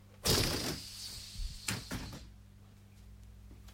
balloon noise deflated
ballon, clown, delated, funny, noise, party